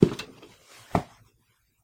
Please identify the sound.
Footsteps-Step Ladder-Metal-07-Down
This is the sound of someone stepping down from a metal step ladder.
Walk, Run, metal, step-ladder, ladder, walkway, Footstep, Step